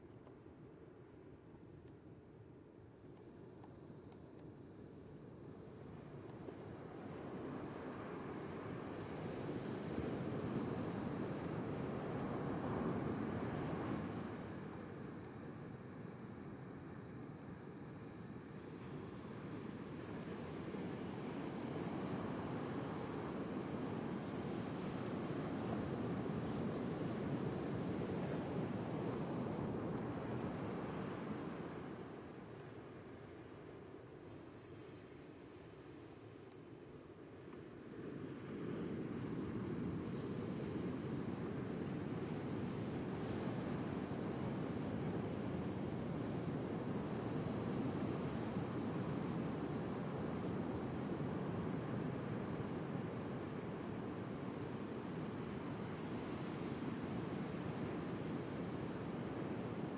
CARNOTA BEACH AB 2M
Short recordings made in an emblematic stretch of Galician coastline located in the province of A Coruña (Spain):The Coast of Dead
beach, ocean, sea